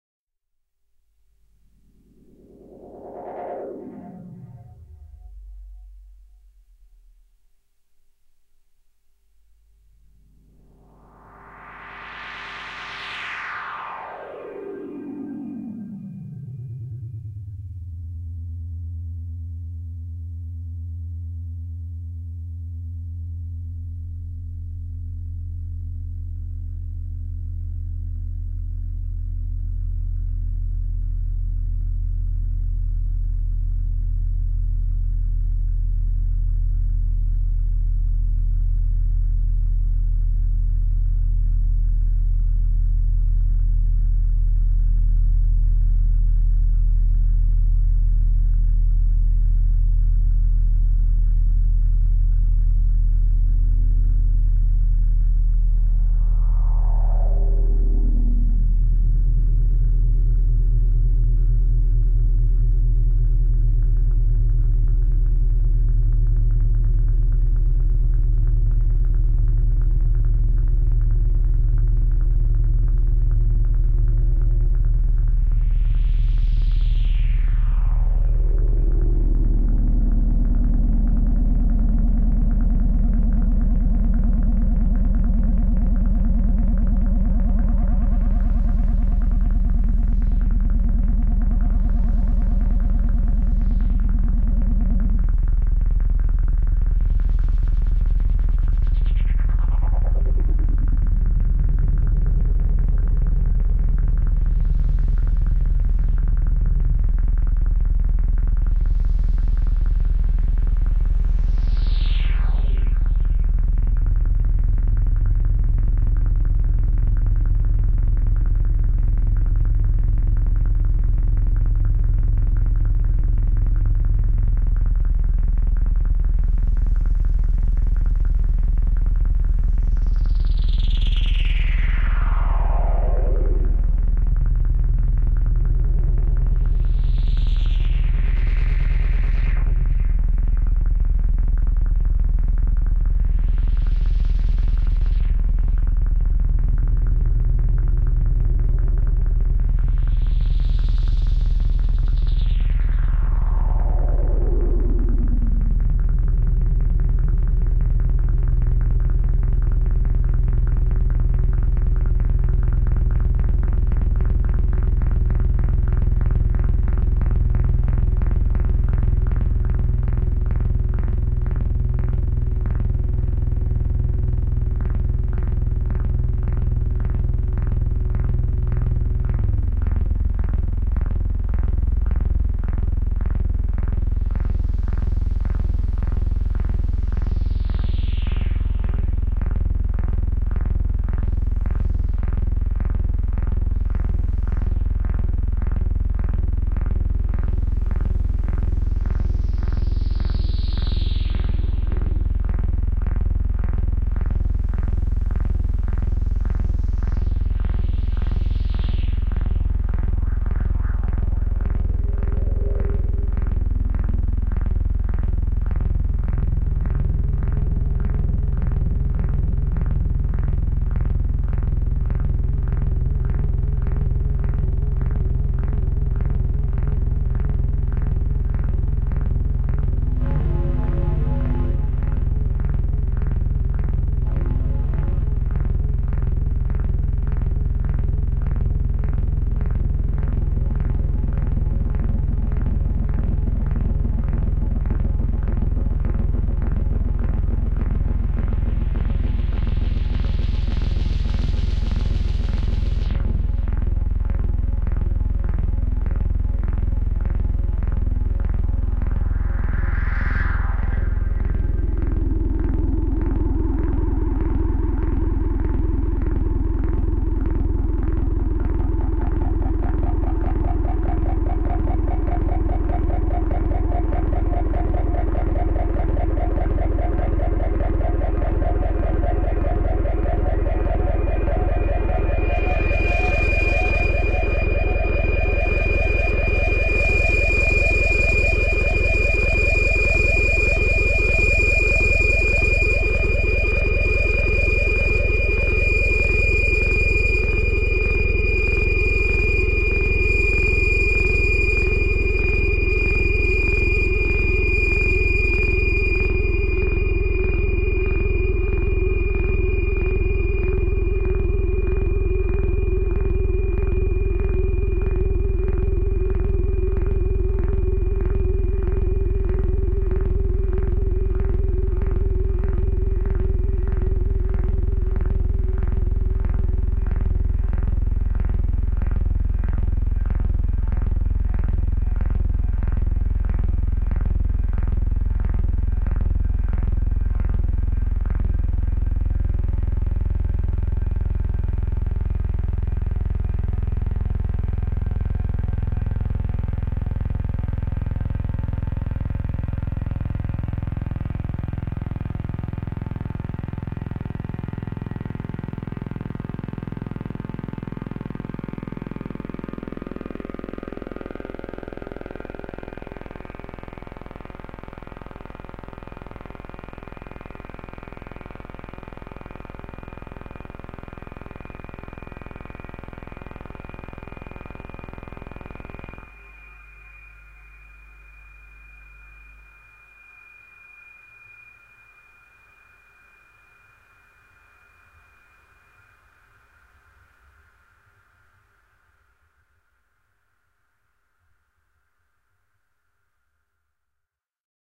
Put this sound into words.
This sound was created using three Korg Monotrons (Original, Duo and Delay) with the help of a Behringer V-amp2 for FX and feedbacks. All the sounds were manipulated in real-time, no post-processing was done to the track. Ideal for sampling and create new SFX or for ambiances. The title correspond to the date when the experiment was done.
Movie, Free, Cinematic, Dark, Spooky, Ambiance, Ambient